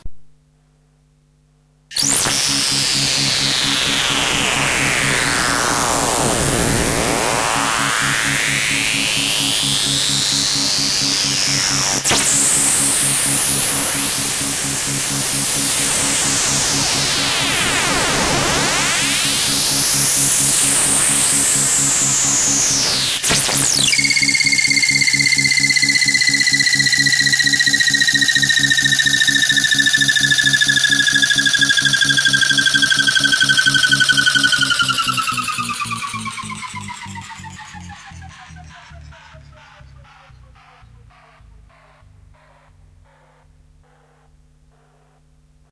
alias effecting
Sampled impression of one of the sounds I made on my Roland D50.
D50, extreme, Synthesizer